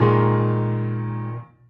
Usyd Piano Chords 09
Assorted chord oneshots played on a piano that I found at the University Of Sydney back in 2014.
Sorry but I do not remember the chords and I am not musical enough to figure them out for the file names, but they are most likely all played on the white keys.
chords, keys, piano